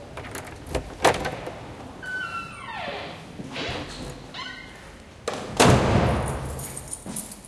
Door is opened and closed in a reverberant hallway. Soundman OKM into SD MixPre-3